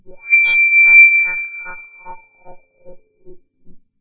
Multisamples created with subsynth. Eerie horror film sound in middle and higher registers.
evil, synthesis